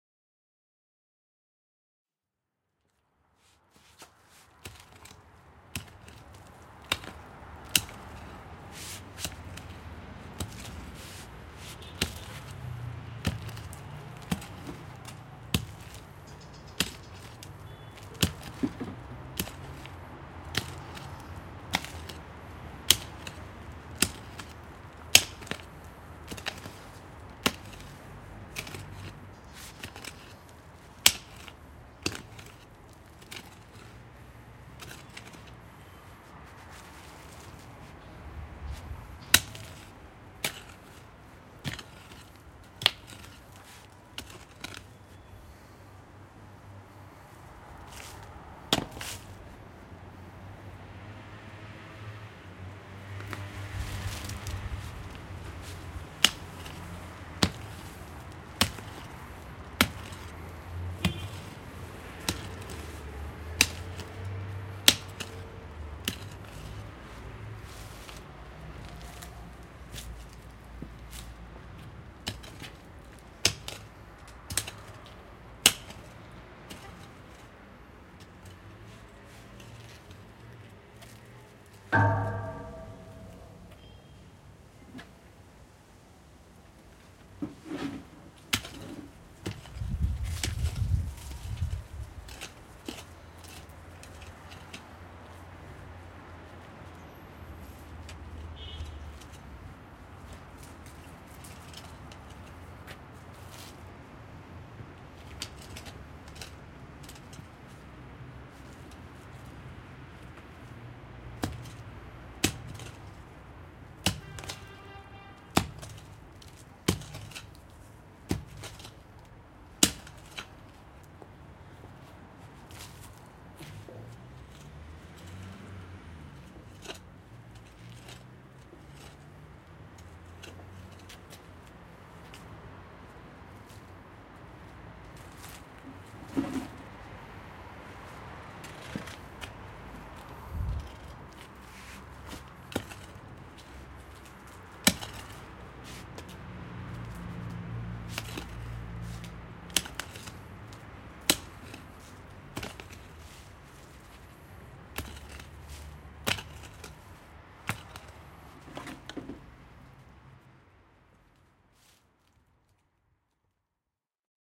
date: 2010, 21th Feb.
time: 03:00 PM
place: via Buonriposo (Palermo, Italy)
description: Sound recorded in a house on one side overlooking a fairly busy street and on the other side overlooking a huge garden. This is the sound of a hoe who plows the ground.